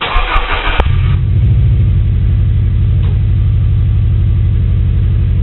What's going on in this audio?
A car engine starts with belt squeal
car, start